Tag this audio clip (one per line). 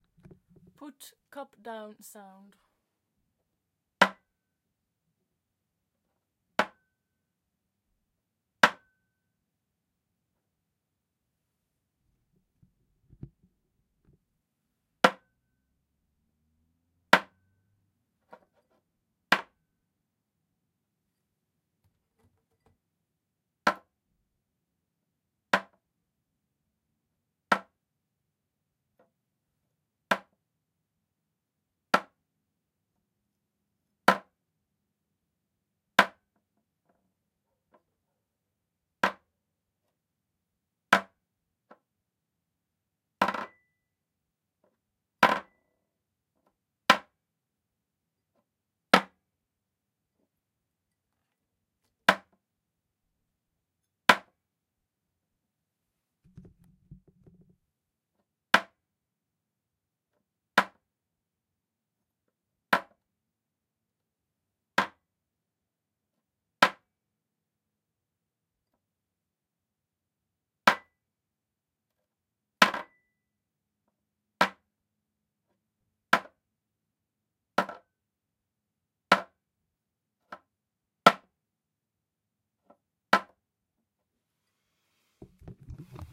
glass
cup
putting
down
empty